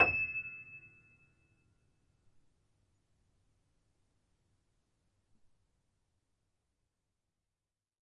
upright choiseul piano multisample recorded using zoom H4n